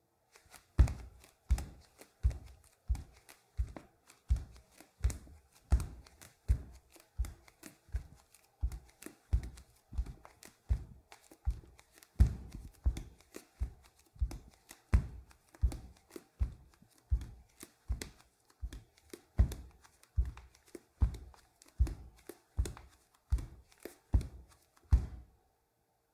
01-27 Footsteps, Wood, Barefoot, Slow Pace
Walking barefoot on a wood floor, slow pace
hardwood, wood, barefoot, footsteps, walking